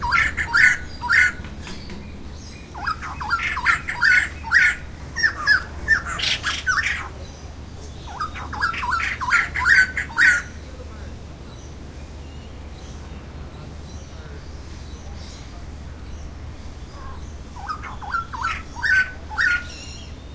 Calls from a pair of White-crested Laughing Thrushes. Recorded with a Zoom H2.